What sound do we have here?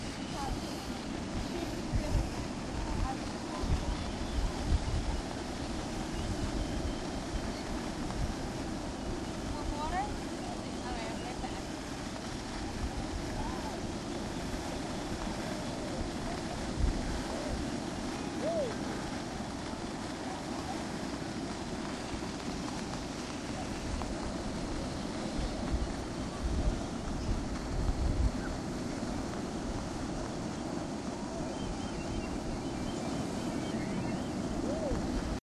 newjersey OC beachsteel keeper
10th Street beach in Ocean City recorded with DS-40 and edited and Wavoaur. The whistling sound in the background is from the kite string tied to sign nearby.
beach, ocean-city